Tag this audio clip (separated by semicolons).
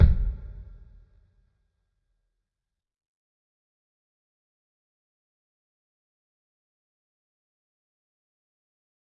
god; home; pack; record; trash